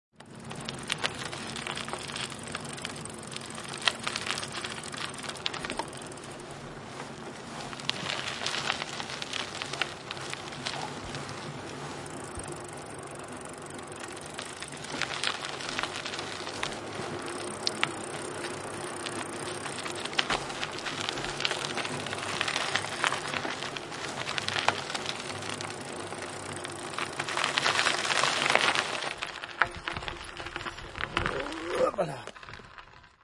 1 Bike on a nautral road
bicycle, ride